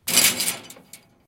Metallic Rattle 2
Boom, Crash, Tools, Bang, Hit, Metal, Smash, Tool, Plastic, Friction, Steel, Impact